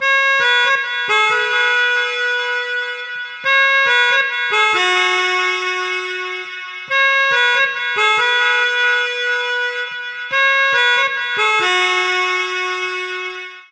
DW 140 F#M LIVE MEL PHRASE
DuB HiM Jungle onedrop rasta Rasta reggae Reggae roots Roots